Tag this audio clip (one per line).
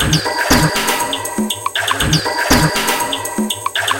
FreesoundGLoopGenerator
percussive